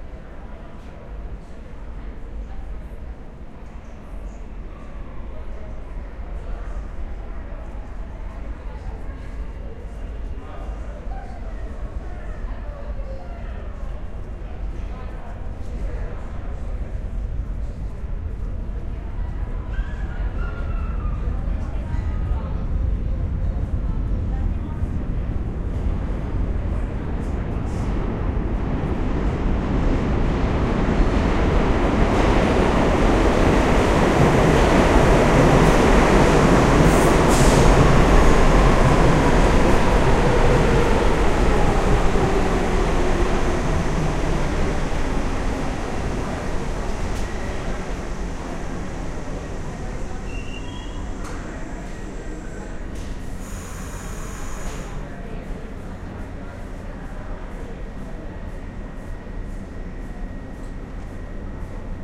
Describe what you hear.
Subway Platform Noise with Train Aproach and Stop
station, under-ground, new-york, city, approaching, nyc